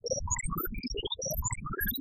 Loopable alien birdsong pattern for song construction. I do not claim to set loop points or determine bpm on these.
bird, synth, element